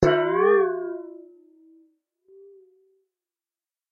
Bowl With Water 5
A stereo recording of a stainless steel bowl that has some water inside it struck by hand. Rode Nt 4 > FEL battery pre amp > Zoom H2 line in.
boing, bowl, oscillation, stainless-steel, water, xy